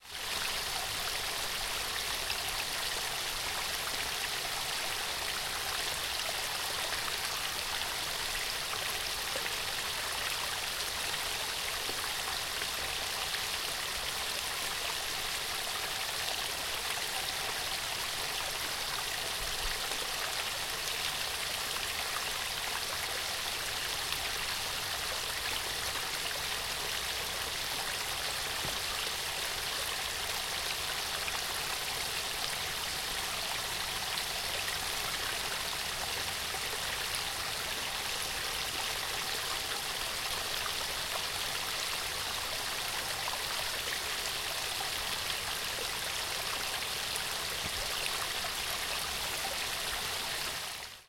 Waterfall, Small, B
Raw audio of a small waterfall by the University of Surrey lake.
An example of how you might credit is by putting this in the description/credits:
The sound was recorded using a "H1 Zoom recorder" on 27th October 2016.
Stream, Fall, Small, Water, Waterfall